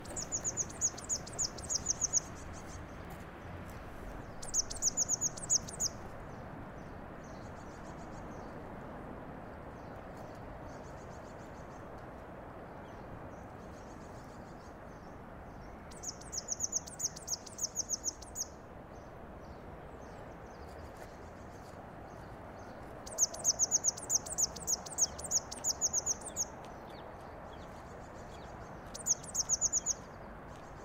Birds distantTraffic
Some birdsong with traffic in the background.
Zoom F8, Røde NTG4, Blimp
traffic, nature, field-recording, spring, birds, bird, park